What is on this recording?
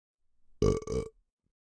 Produced from the drinking of beer.
Bodily, Burp, SFX, sound